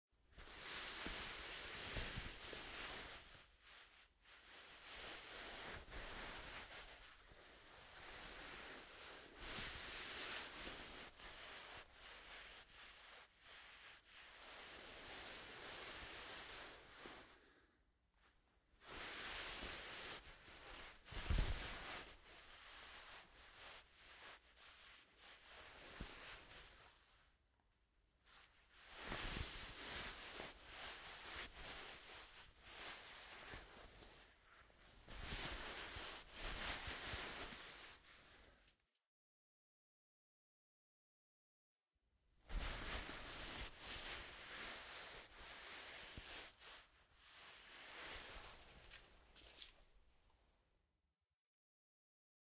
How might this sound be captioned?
A denim jacket and a wool coat rubbed together to recreate the sound of a hug for an audio drama. Recorded in an iso booth with a large diaphragm condenser microphone and de-noised.
Cloth, Fabric, Foley, Rustling